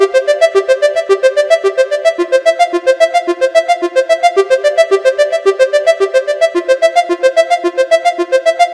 A member of the Alpha loopset, consisting of a set of complementary synth loops. It is:
* In the key of C major, following the chord progression C-F-C-F.
110bpm, synth